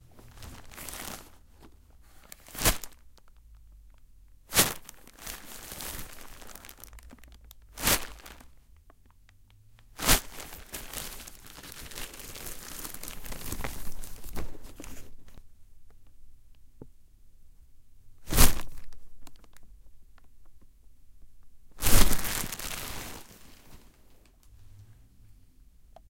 Plastic Bag 2 Crunch
Percussive scrunching of a plastic bag. Stereo Tascam DR-05.
bag, crinkle, stereo, plastic, plastic-bag, crush, crumple, asmr